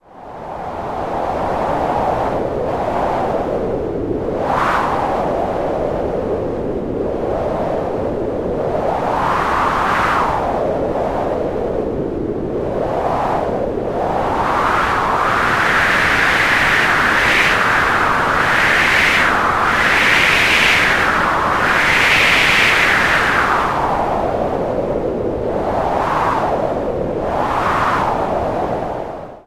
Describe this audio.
Wind - Alsa Modular Synth
White Noise --> VCF --> PCM Out
wind, naturesounds, nature